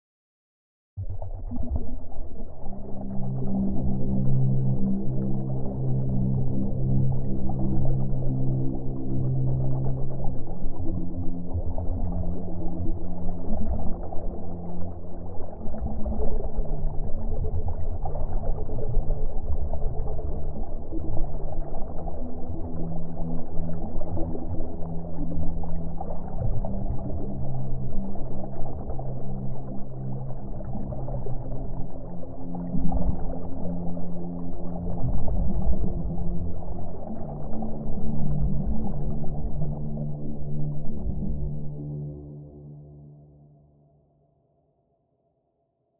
Water Filling Machine Eerie
Spooky sound of water filling a washing machine, as heard by a doll trapped inside with an under layer of tension building sound.
Bubbles, Eerie, Water, Spooky